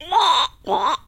A cartoony frog